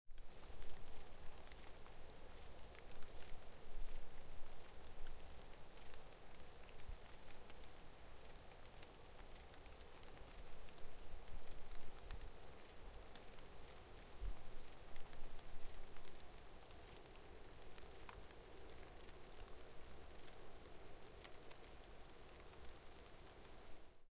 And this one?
Grasshoppers sings songs by accompaniment of rain. One of them in solo.